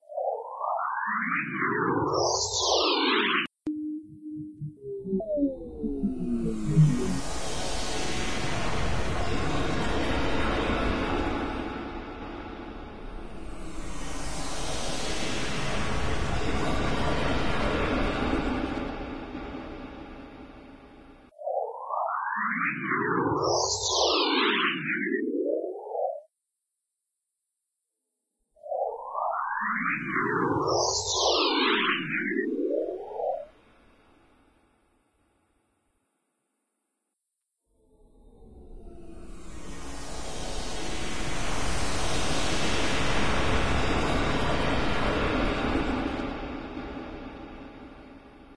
space game lots of movement
game, space, stuudioOne